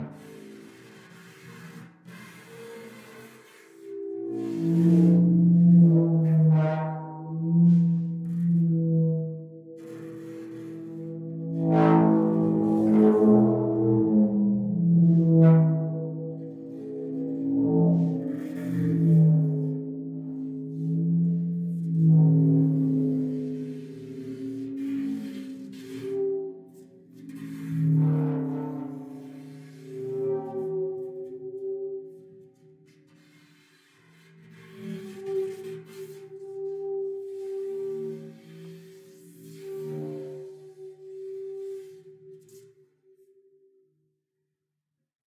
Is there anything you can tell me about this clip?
timp superball mallet 7
timpano (kettle drum) played with a superball mallet. another long take, with higher tones and scrapes. reminds me of an alphorn with feedback and the sound of rubbing balloons. (this is an acoustic recording, no effects have been added! the apparent reverb is from the drum itself, not the room)